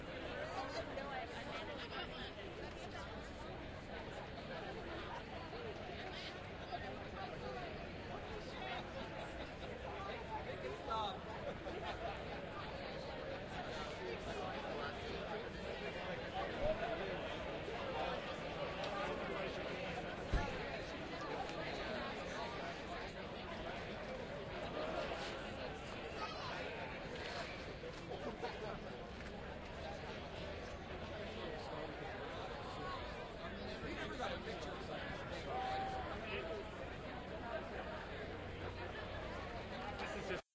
Stereo binaural field recording of a large crowd talking amongst themselves.